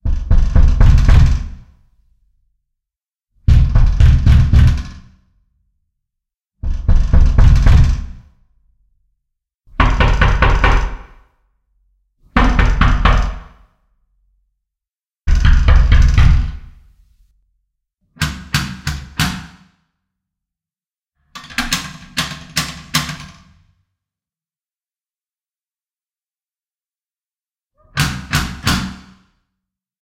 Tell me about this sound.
Sound port - Sound effects
As a knock on the door with your hand as you knock on the door and I play you?
Alien, effects, media, port, Radio, Recording, Sound